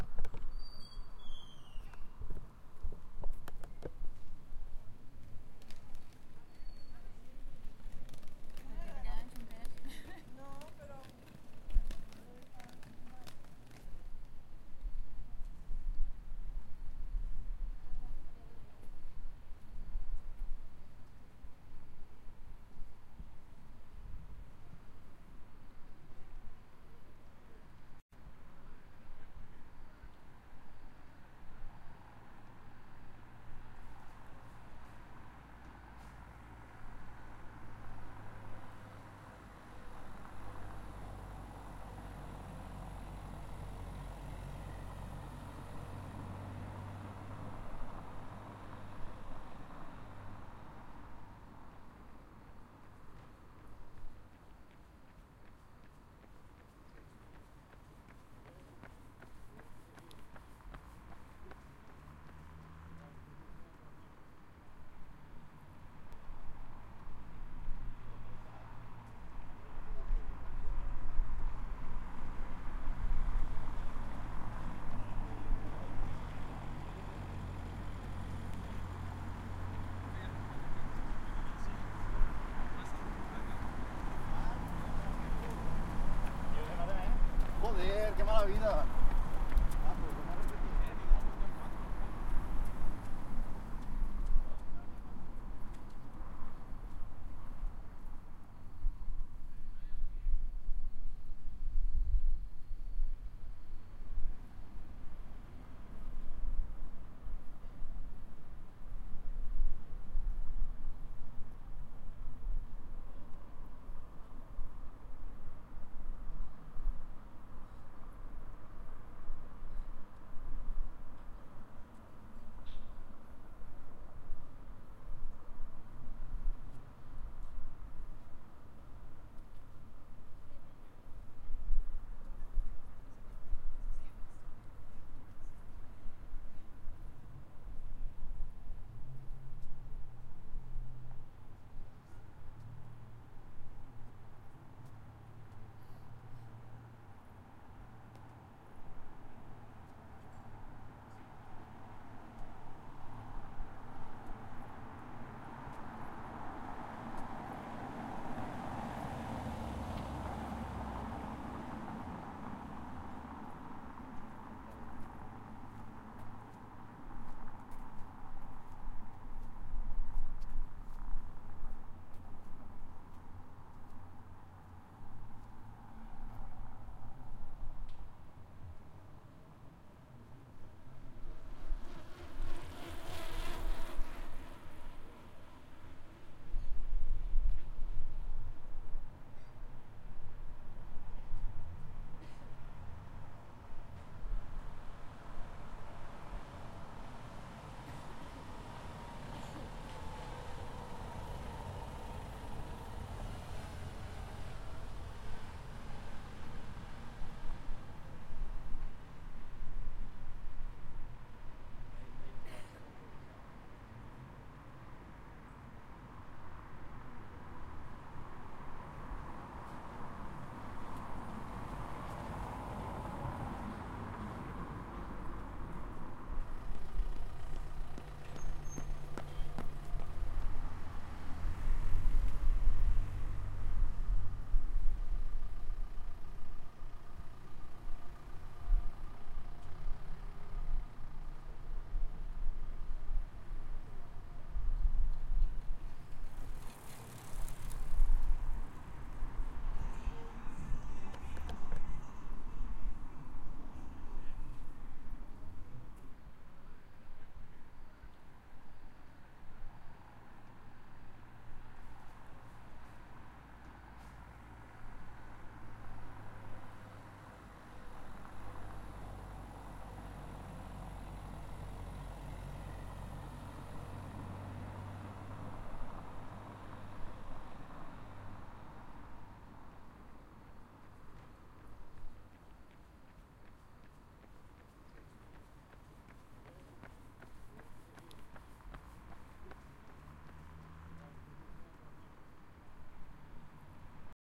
This sound was recorded onthe seafront of Gandia's Beach. We can hear the wind, the waves, some cars, people...
GandiaBeach, runners, seafront